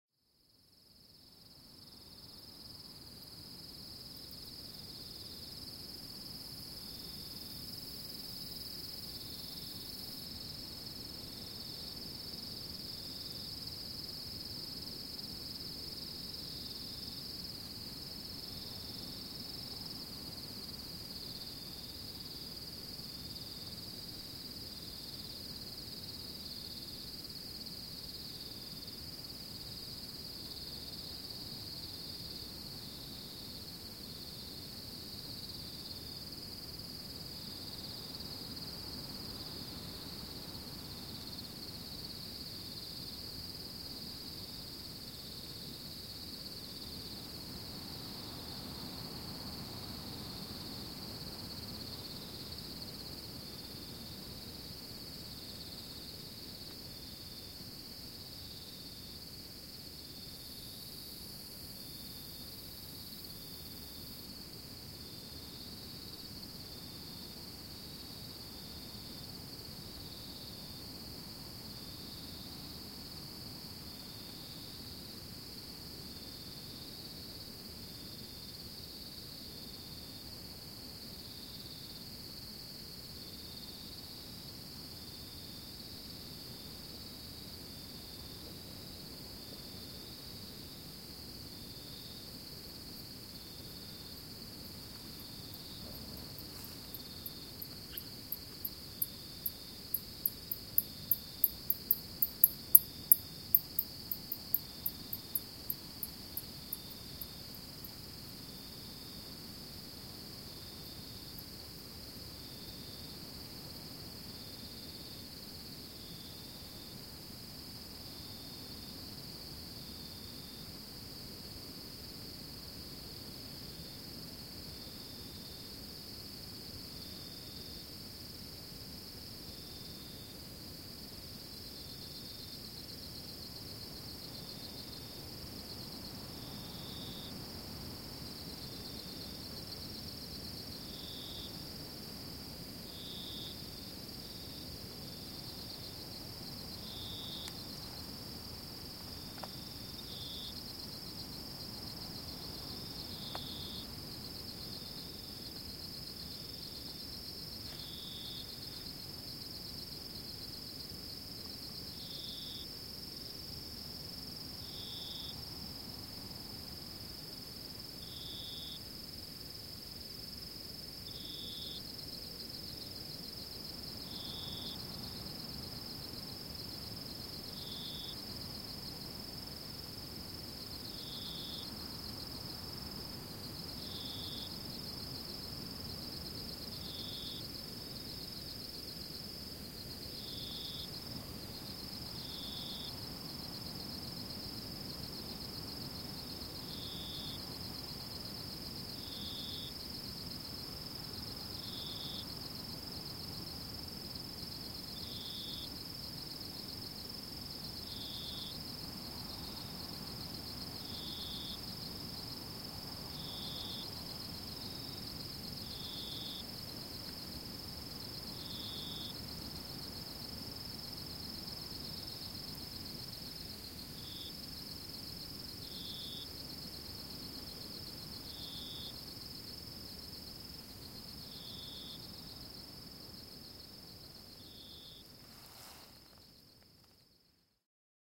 King's Hut at Night
An MS stereo recording near an abandoned old building in the Australian bush.
atmosphere, crickets, wind, ambiance, ambience, field-recording, insects, nature, ambient